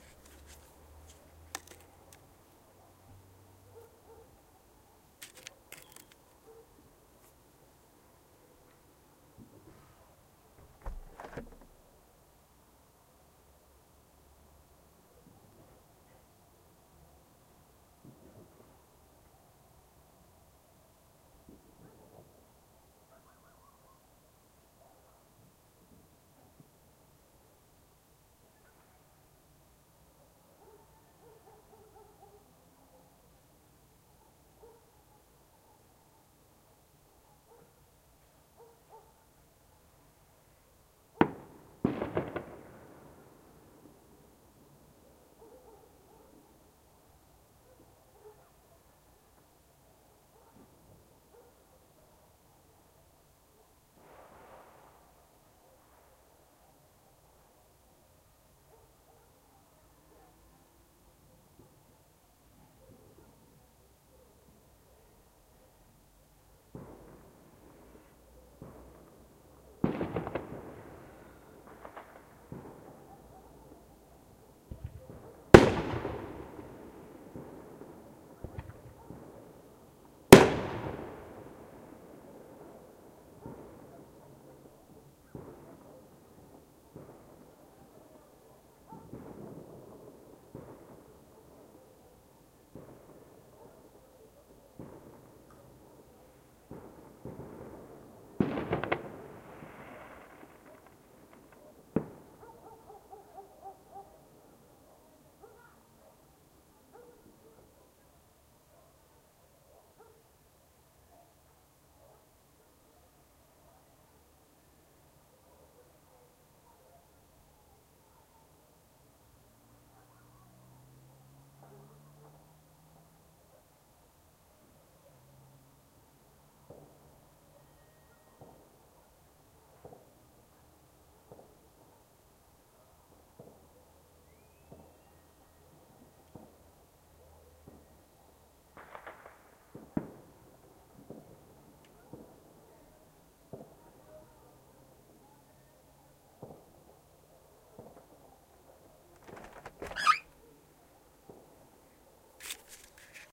Some firework launch 4
Some firework launch recorded by SONY STEREO DICTAPHONE in Pécel, on 31st December 2011.
fireworks, year